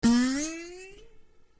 cartoon jump
cartoon style jumping sound